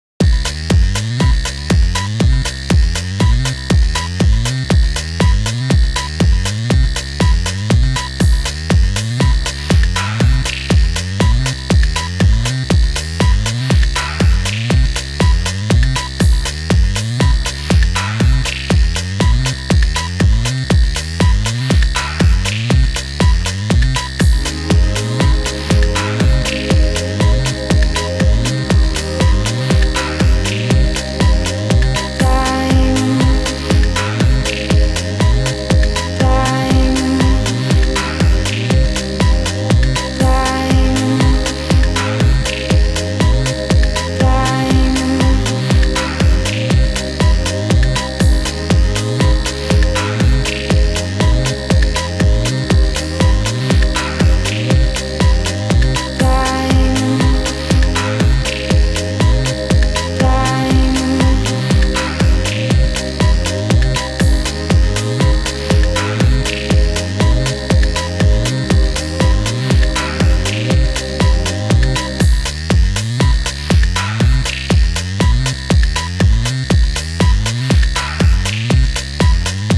techno live loop
edited and recorded in ableton live.
Massive and ableton live sounds.
synth-pad, original, voice, ableton, synth, house, techno, tecno, synth-bass, live, sound, loop, beat